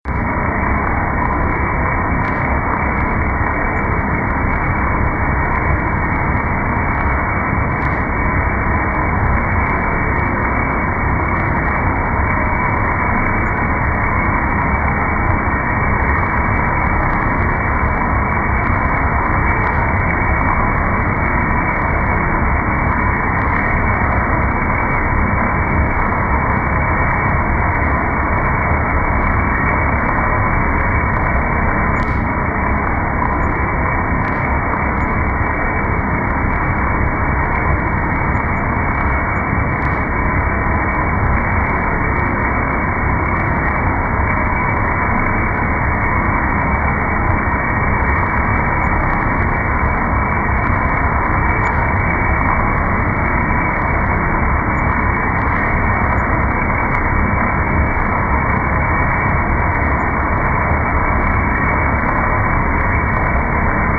rain slow motion
recorded on iPhone slow mo and remixed in Studio One 3.
slow-motion, rain, nature